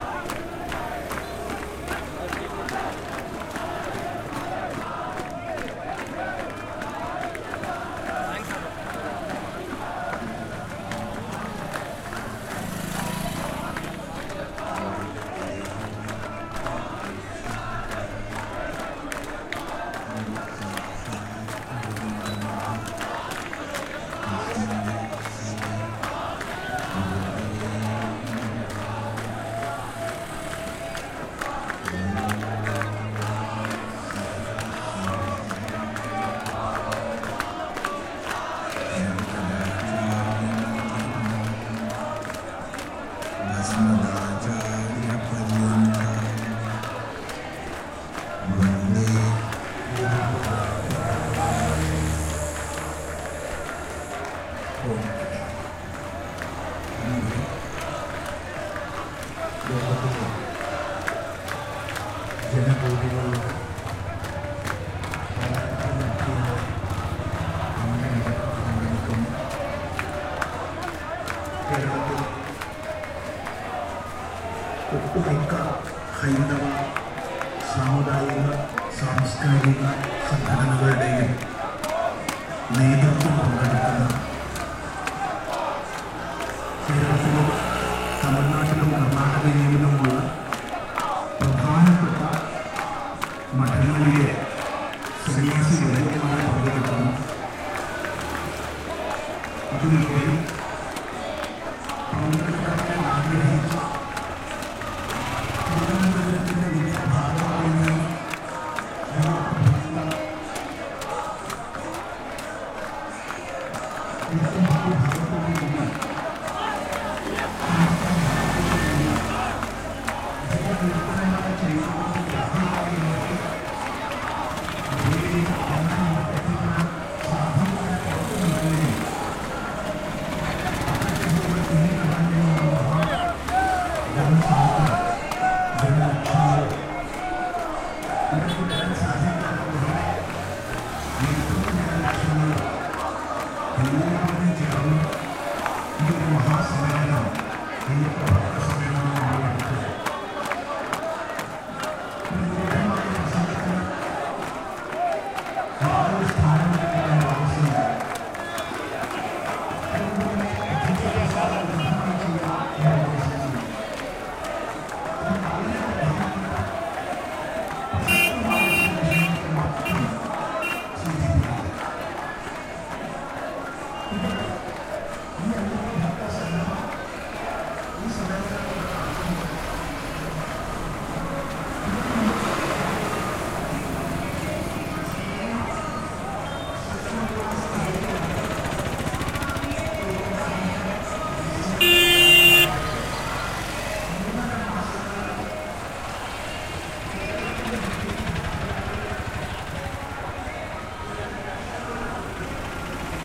Protest-Recording-1
Protests on the streets of Kerala, India in January 2019
parade, people, political